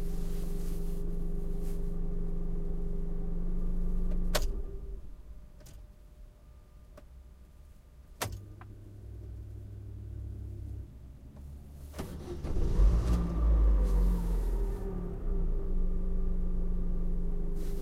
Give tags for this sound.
auto engine car start Chevrolet stop idle ignition automobile lacetti vehicle motor machine drive

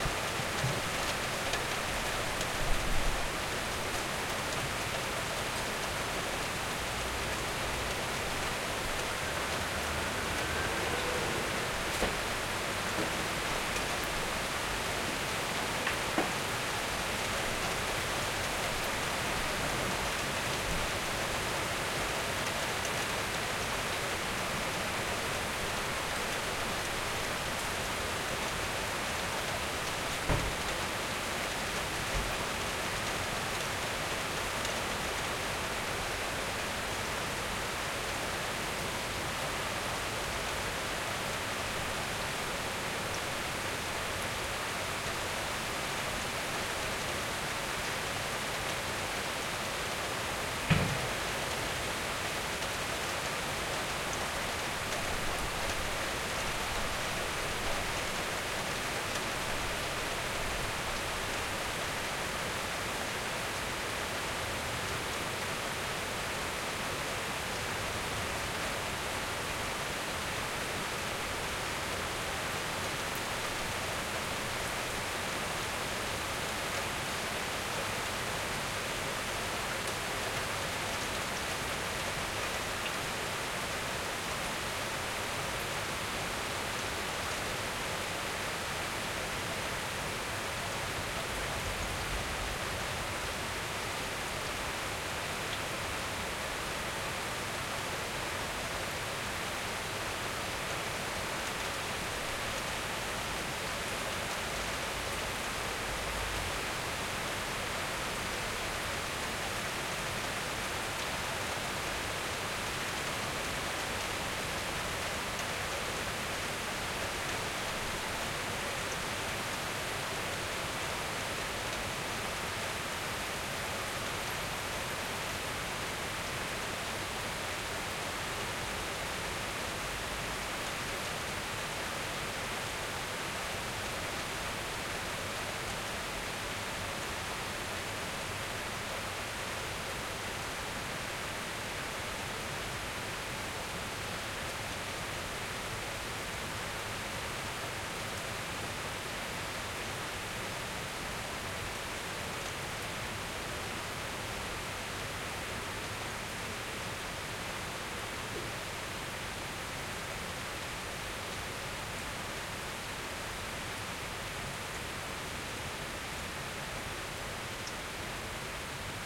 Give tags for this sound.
field-recording rain raining

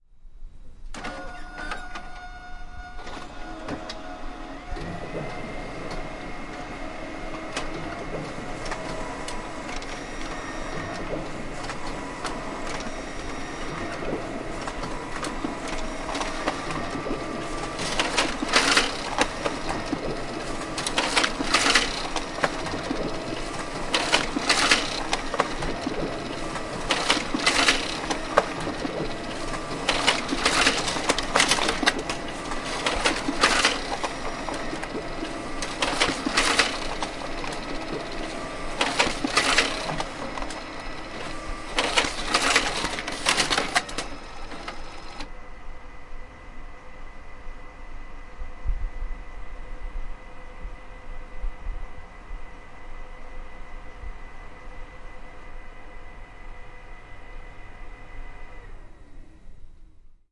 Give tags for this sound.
close machine print printer xpress

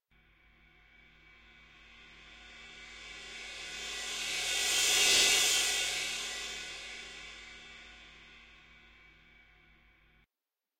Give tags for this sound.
Cymbal Roll Stereo Sweep Swell

